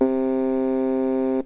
Old realistic concertmate soundbanks. Mic recorded. The filename designates the sound number on the actual keyboard.
concertmate, keyboard, lofi, radioshack, realistic, samples